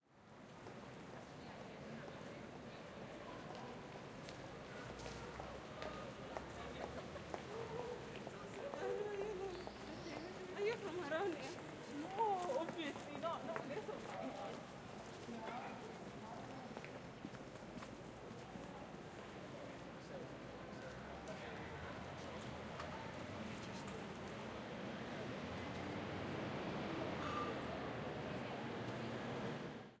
Crowd Noise light
A selection of ambiences taken from Glasgow City centre throughout the day on a holiday weekend,
Ambience, City, crowd, Glasgow, H6n, people, Street, traffic, Walla, Zoom